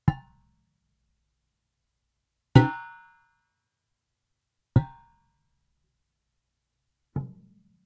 Rubber coated plier handles hitting a stainless steel pot recorded from inside with laptop and USB microphone in the kitchen.
kitchen, sounds, dangerous, foley, percussion